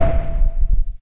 STAB 077 mastered 16 bit
Electronic percussion created with Metaphysical Function from Native Instruments within Cubase SX.
Mastering done within Wavelab using Elemental Audio and TC plugins. A
short sonar like sound followed by some low frequency rumbling for
special effect purposes.
electronic, percussion, stab